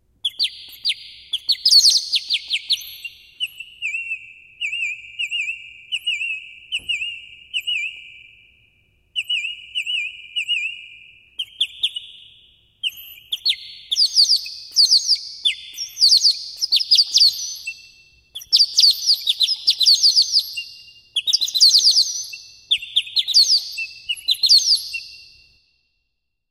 Bird Whistle
One of those whistles that you add water to and it sounds like a bird. Added reverb for fun.
Bird, effects, Whistle